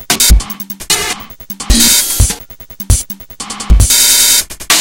Ancient Kid 2
glitch, core, idm, drums, break